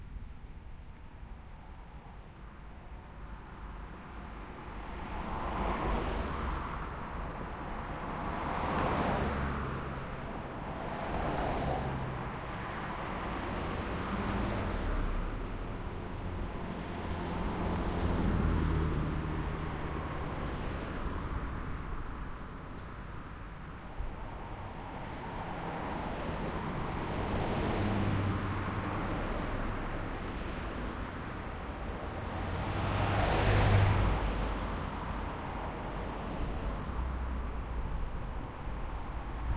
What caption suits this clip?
I wanted to test my new binaural mics, what better way than to listen to cars driving by?Recorded with Sound Professionals in-ear binaural mics into Zoom H4.
binaural, car, drive, field-recording, outside, passing, road, street